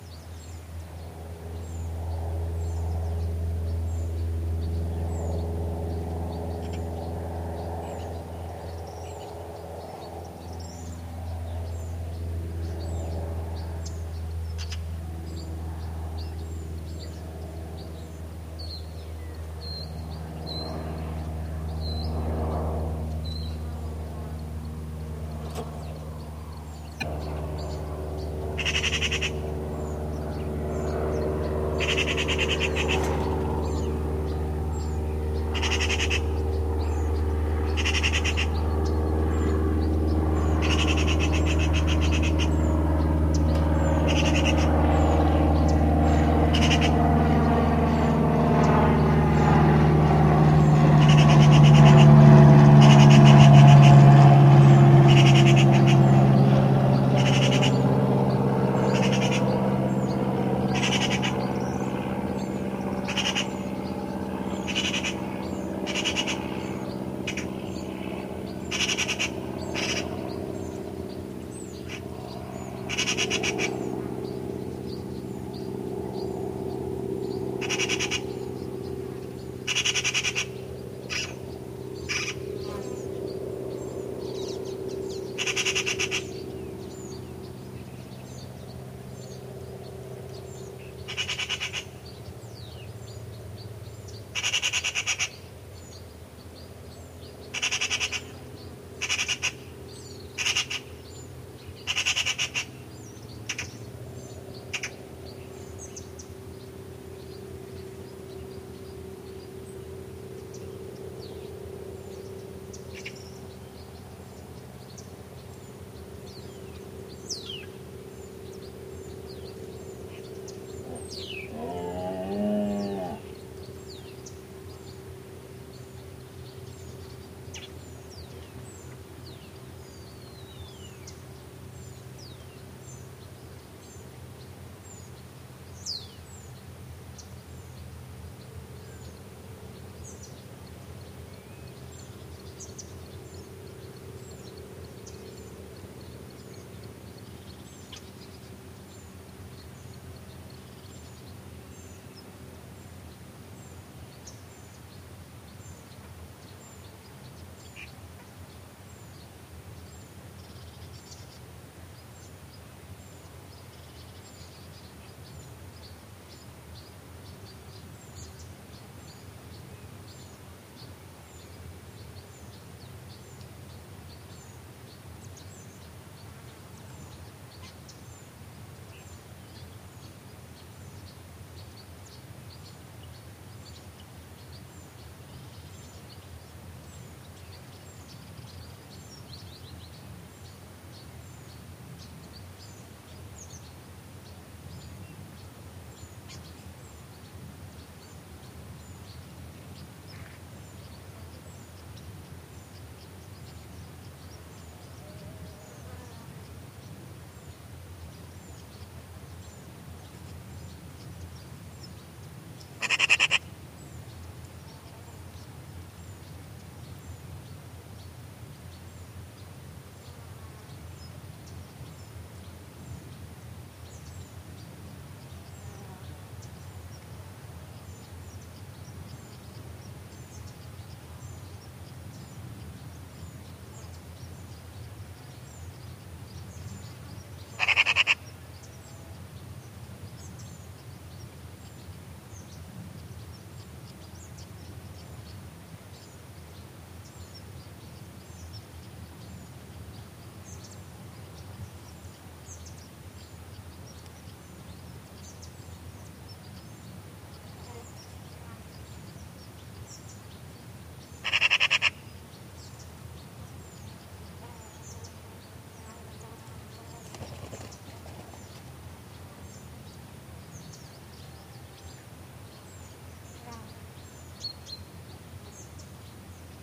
An airplane fades in, and as it gets closer a Magpie starts calling, frightened/inspired by the roaring of the engine. Over the fading out of the plane you can hear the magpie still calling, bird chirps, moos, and in general ambiance of marshes in a summer morning. Mic was a Sennheiser ME62 on a K6 system.